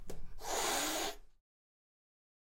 Cat hiss #4
A cat hissing
anger, angry, animal, cat, hard, load, per, scared, scary, scream, screaming, shout, shouting, yell, yelling